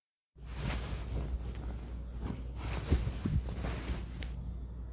Moving bed sheets